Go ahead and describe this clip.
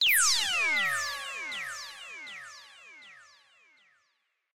A resonant lazer sound with an echo
laser, electronic, weapon, sci-fi, sweep, zap, alien, energy, space-war, digital, resonant, lazer